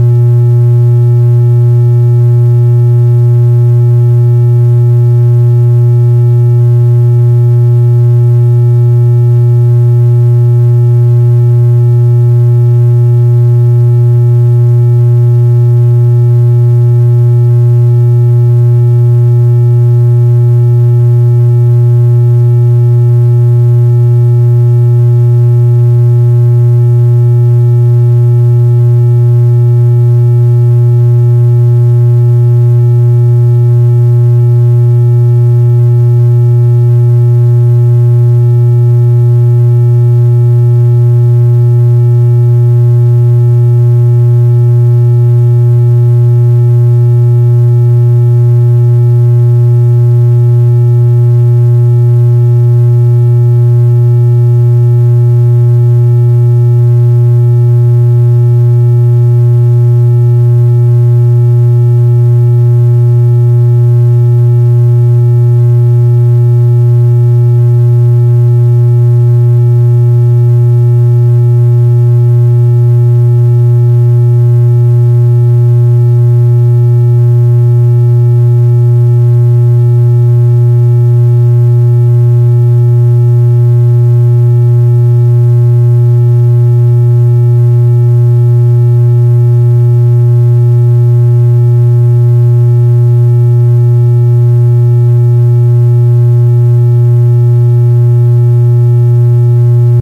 Wire Tone
The is a constant electronic drone generated by a program I made for the purpose of creating this tone. The tone is clipped and has random amplitude variations.